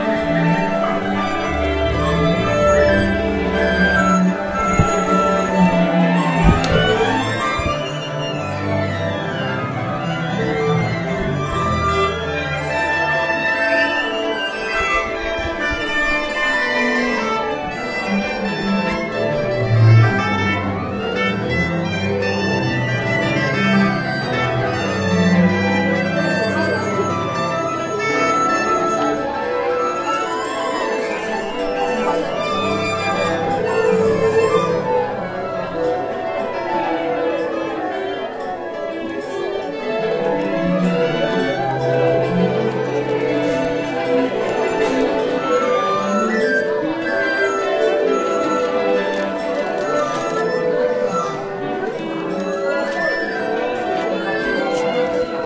minutes before starting concert by Macau Chinesse Orchestra